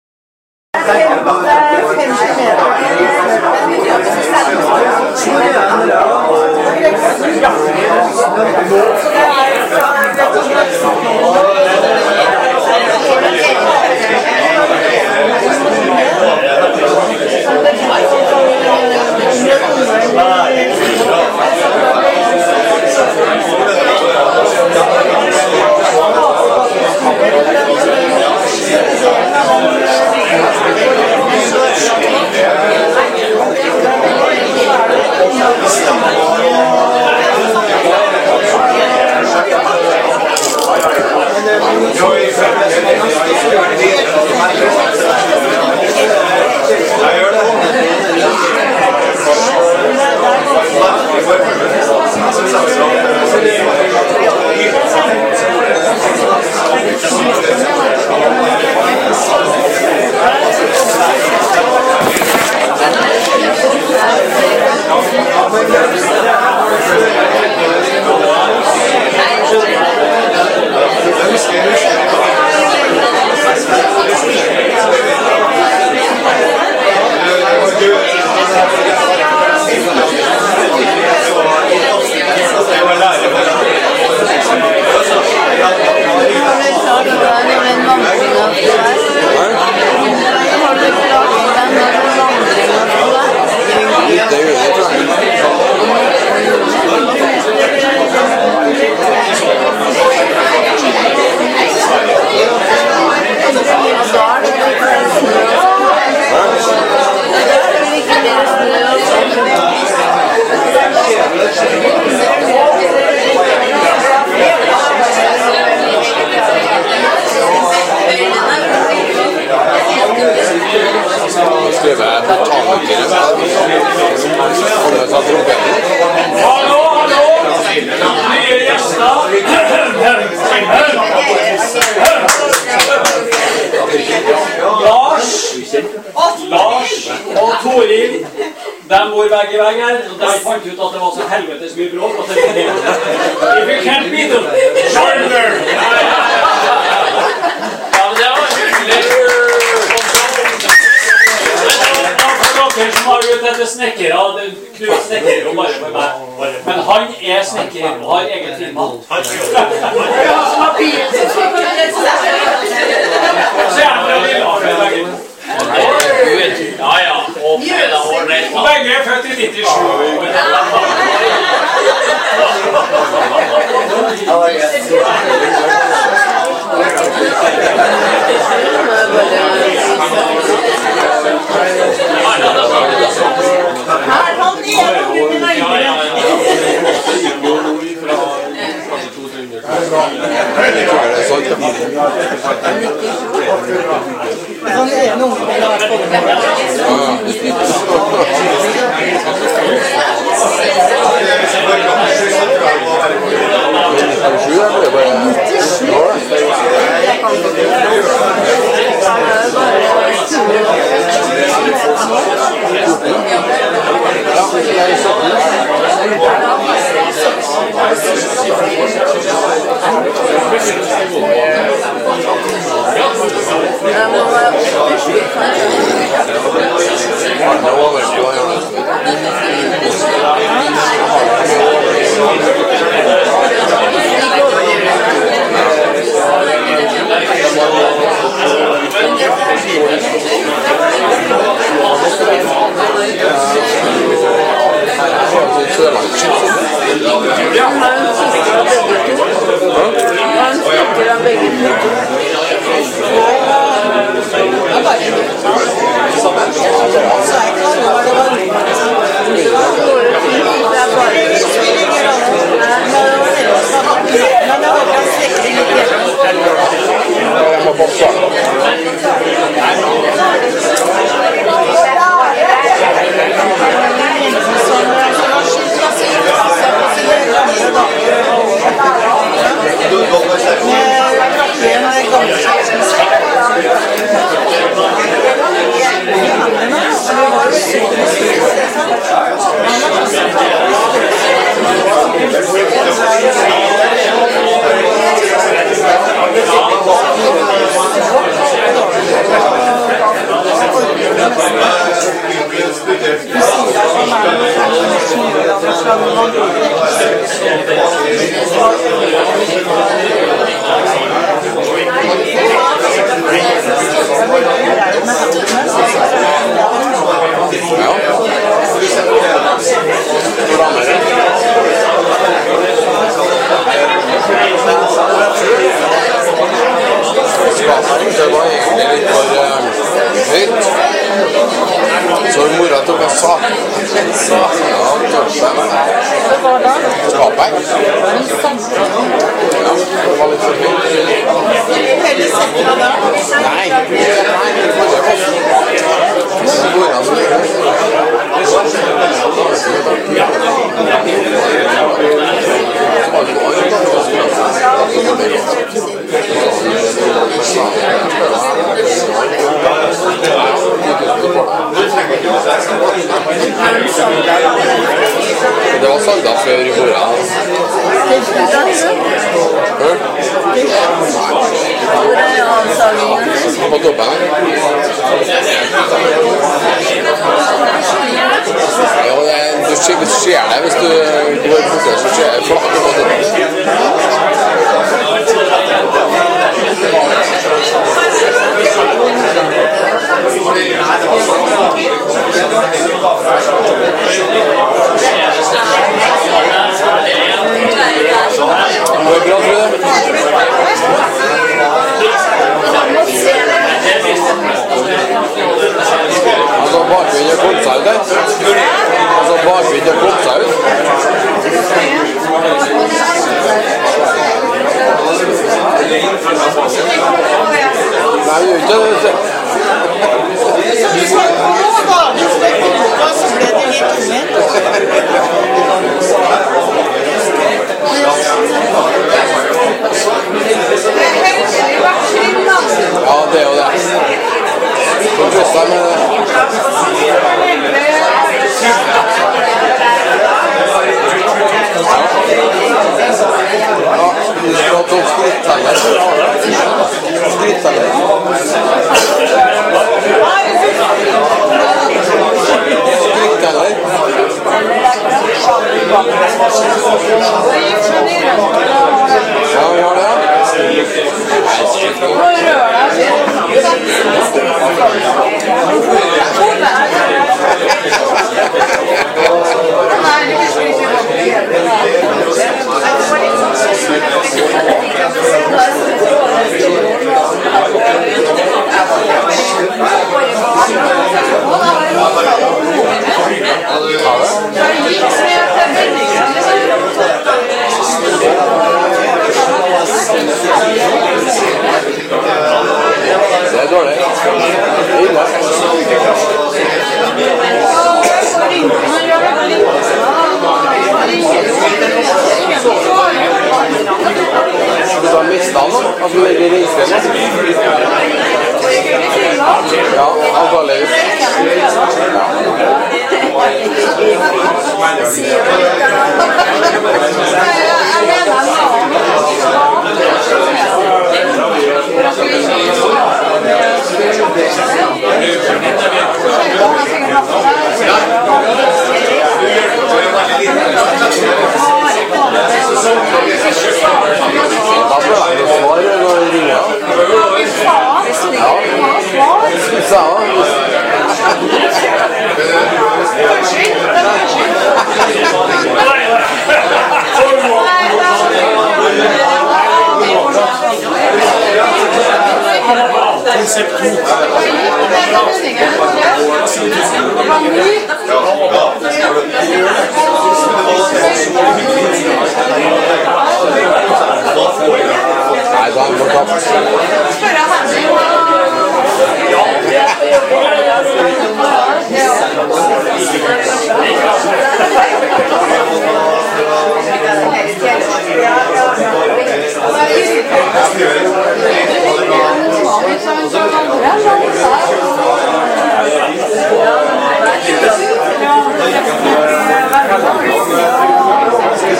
Recording from a phase of a birthday party where no one listen, only talking..